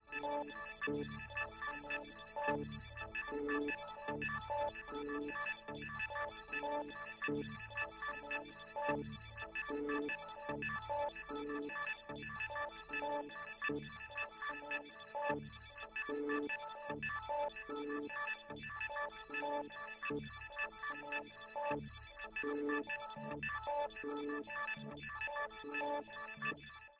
Ambience - Cosmic Rain - 01
An atmospheric ambience sound, made with modular equipment.